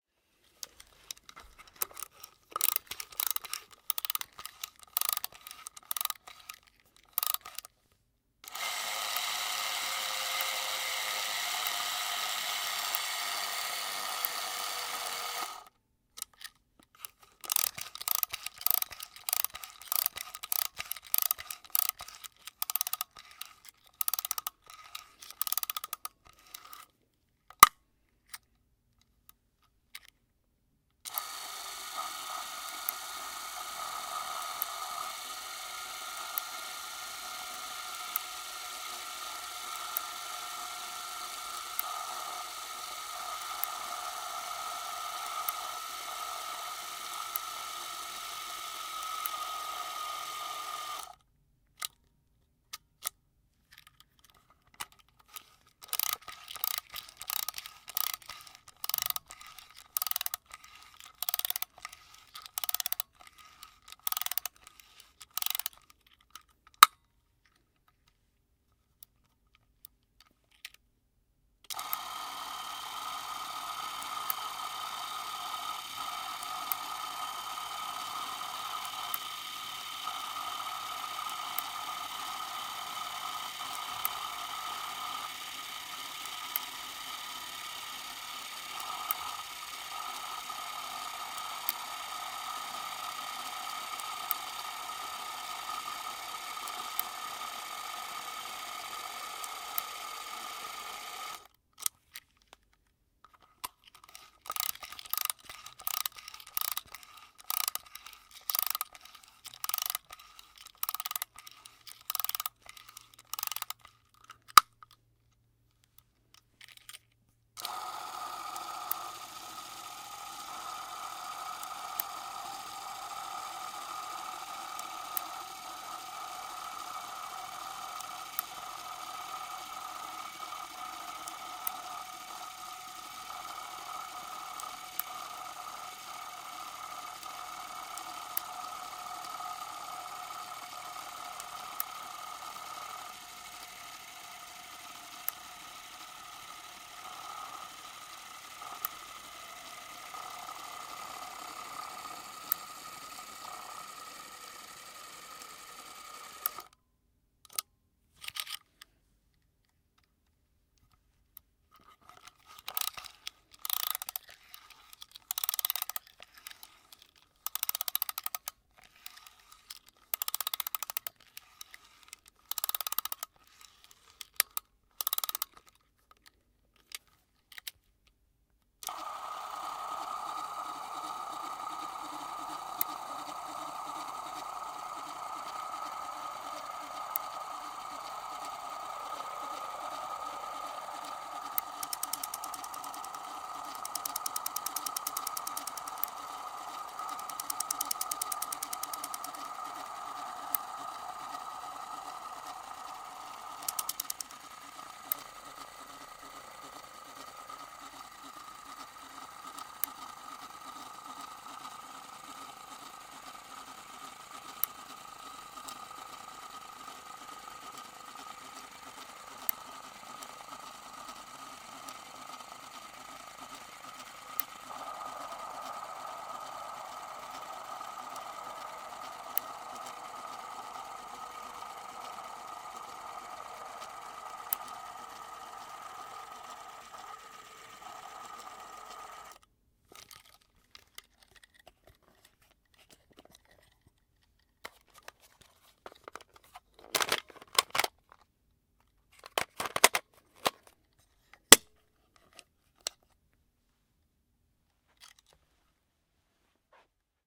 Rare 8mm film camera "quarz 1x8s". There are different fps modes and sound of cassete ejecting/embeding in the final.
Recorded with:
MBOX Pro audio interface.
AKG C414.
shutter, camera, historic, machine, soviet, vintage, film, 8mm, rachet, rickety, super8, russian, rare